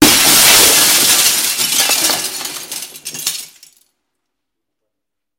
Windows being broken with various objects. Also includes scratching.
break, window